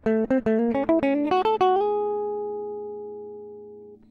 guitar abstract melody5
jazz guitar recorded
guitar, jazz